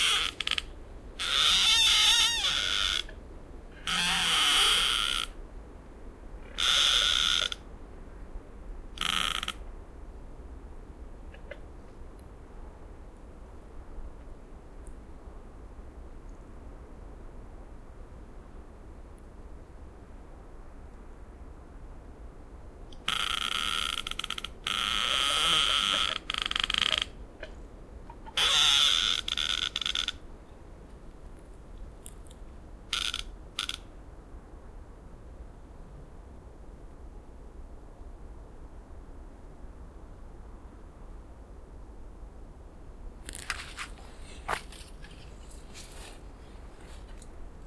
grincement de deux arbres forêt près d'Angers un jour de tempête
two trees creaking recorded in a forest near Angers, France by a stormy day